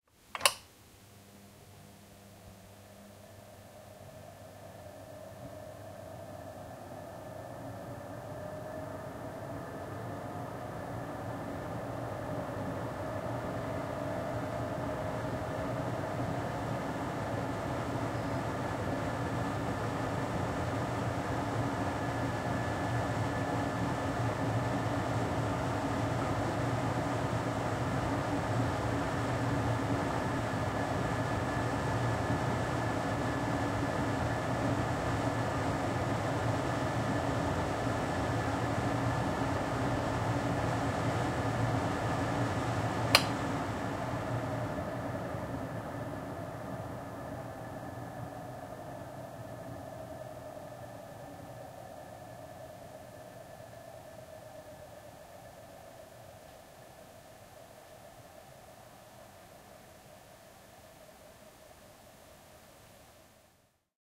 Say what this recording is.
Kitchen Exhaust Fan Low Power Setting
Recording of the exhaust fan over the oven in my kitchen.
Processing: Gain-staging and soft high and low frequency filtering. No EQ boost or cuts anywhere else.
Mechanical, Kitchen, Fan, Motor, Jet, Exhaust, Appliance, Sound, Cooking, Engine